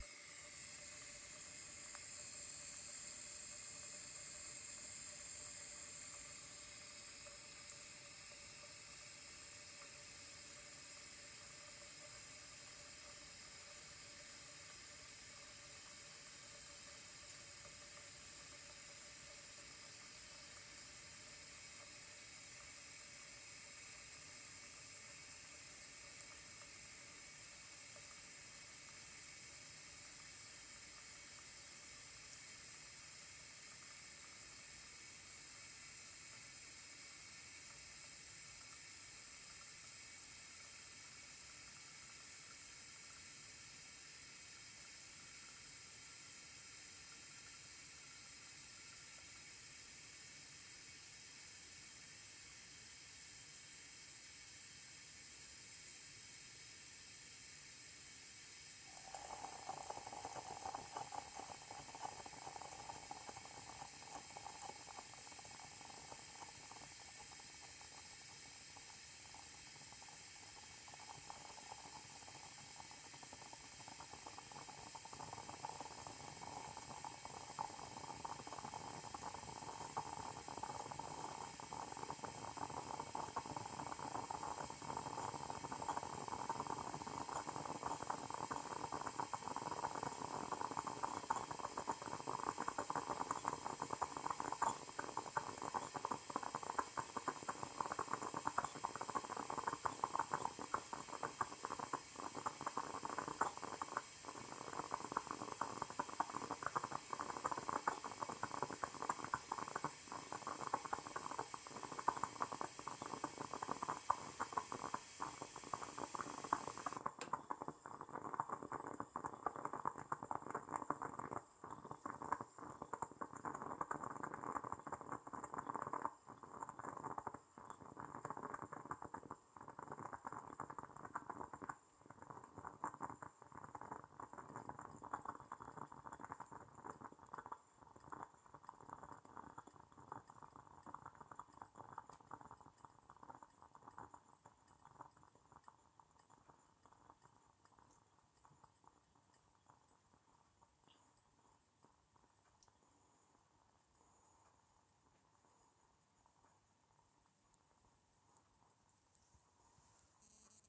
Coffee Pot 02
Sound of a stove-top coffee pot
Atmosphere, Coffee-pot, Stove